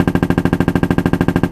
This is a Gunshot sound effect from an automatic rifle.
Gunshot, rifle